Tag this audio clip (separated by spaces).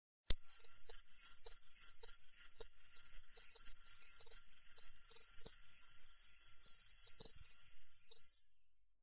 electronic beep noise sounddesign tinnitus